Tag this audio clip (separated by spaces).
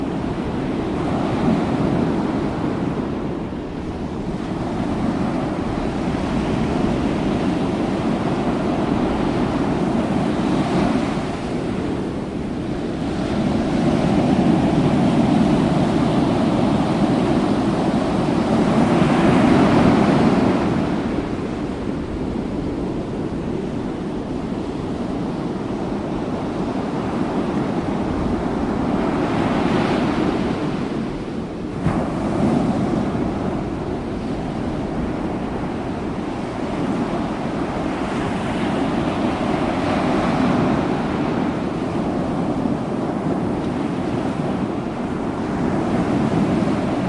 mar andre ondas